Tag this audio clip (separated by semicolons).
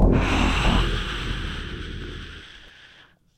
processed; voice; cat; dragon; animal